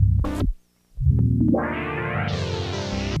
Taken from an improve session on my old Jx-8p synthesizer that was sampled straight into my k2000. This one is lower notes
analog, instrument, instrumental, lo-fi, lofi, loop, noisy, synth